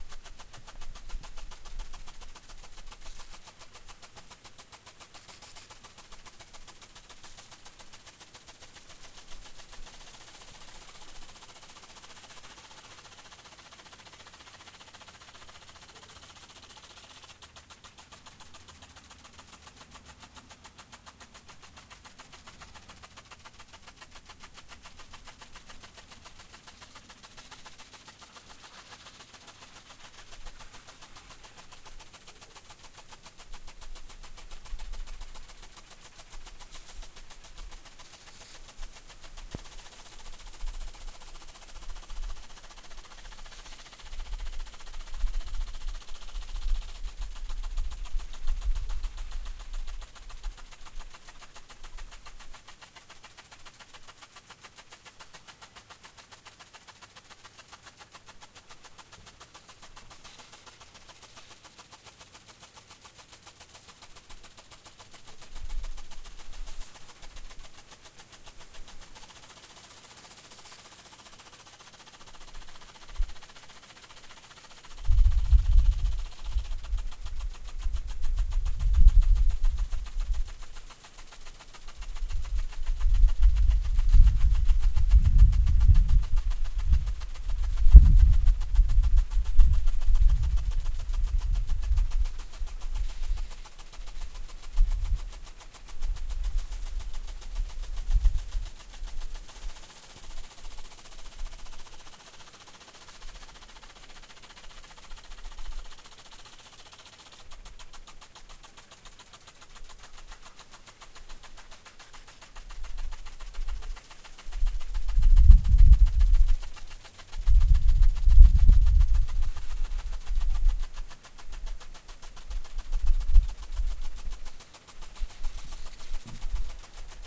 A rotating sprinkler from outside my bedroom window. This sound was recorded September 4th 2014 at Hume Lake Christian Camps in Hume, CA and has not been edited.
field, field-recording, spraying, sprinkler, water, yard